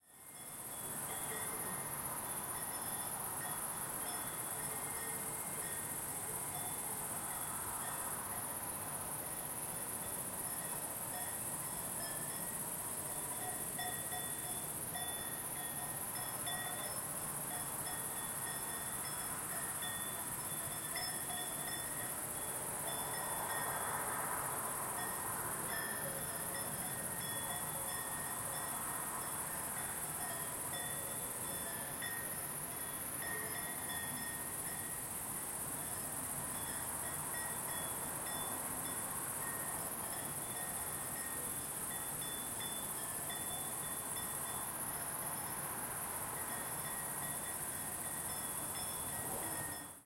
Night at the countryside with cows and crickets
Recorded near a farmhouse with crickets in the garden and cows in the field. In the distance you can sometimes hear cars from a road.
Recorded in Gasel, Switzerland.